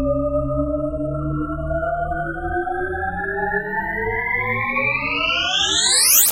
Warp Speed!
My interpretation of the Starship Enterprise engines
Buildup, Enterprise, Spaceship, Swell, Warp, Warp-Speed